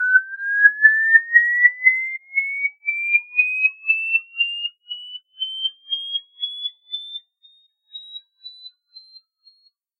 zheng ling 2019 2020 alarme
This sound is made by audacity,I want to make a emergency sound of de alarm. To do this, first I generated a pluck (hauteur MIDI pluck:46), then I changed the speed, Make it faster, 10 seconds. I also changes pitch without changing tempo, le pitch from 8 to 8,the frequency from 1470 to 1654HZ. After that I I added effect wahwah(2.6 hz) et studio fade out .I want to make the sound sounds more urgence. And at the end of de track, I added effet fade out.
alarme, emergency, panique